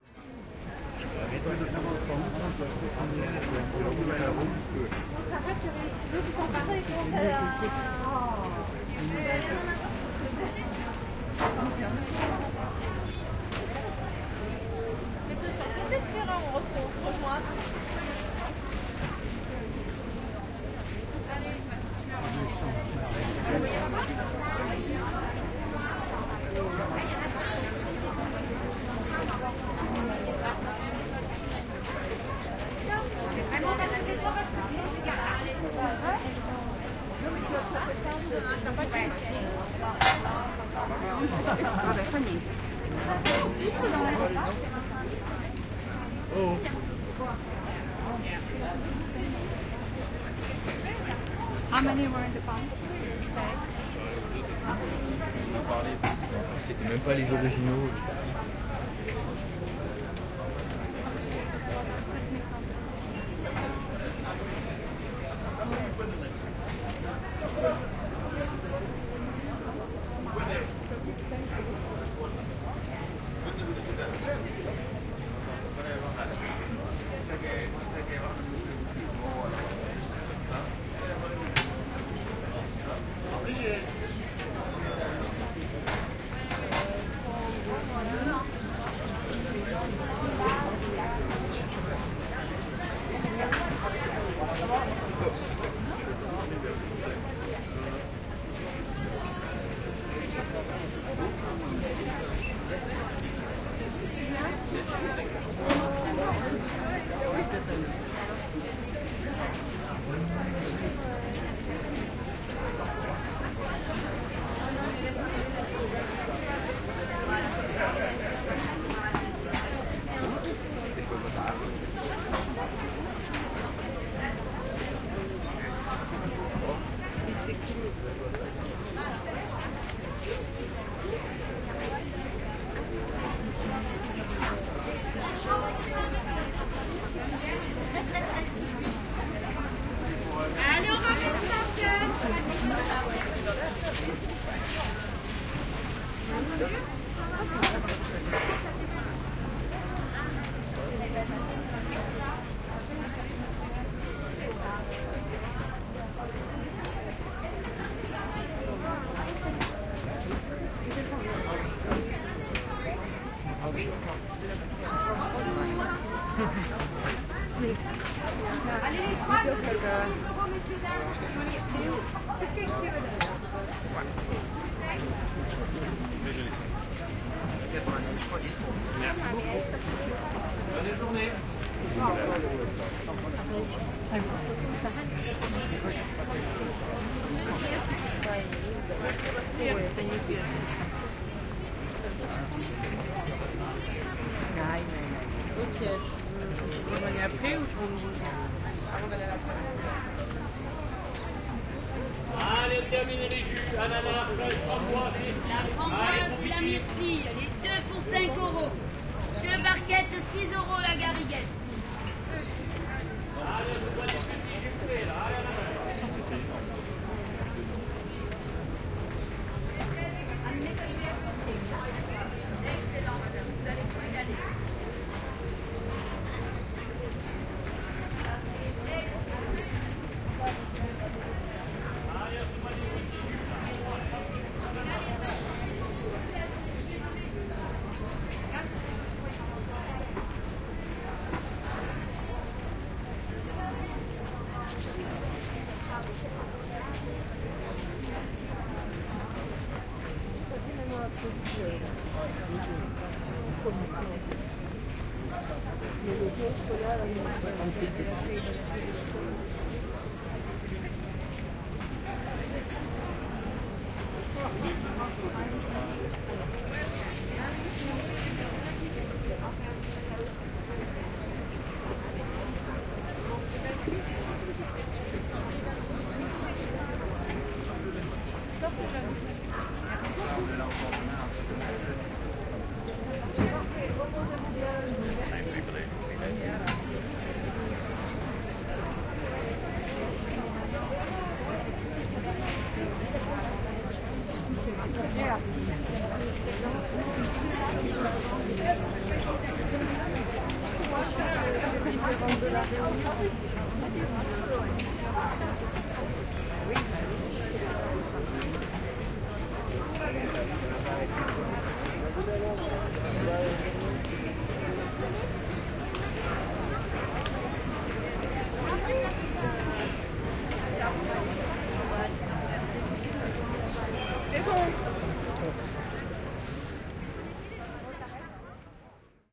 220429 1835 FR FlowerMarket
Flower Market at Nice, France (binaural, please use headset for 3D effects).
The flower market is one of the place you have to visit in Nice. There, you can find flowers, of course, but also vegetables, fruits, perfumes, and many delicious food and traditional products from south-east of France.
Here, I’m walking through the market, to let you hear its typical atmosphere, with sellers, buyers from different countries, and other sounds you would normally hear in a French market.
Recorded in April 2022 with an Olympus LS-P4 and Ohrwurm 3D binaural microphones.
Fade in/out and high pass filter at 60Hz -6dB/oct applied in Audacity.
ambience, atmosphere, binaural, buyers, field-recording, flower-market, France, French, language, market, Nice, outdoor, people, sellers, soundscape, tourists, traditional, typical, vendors, voice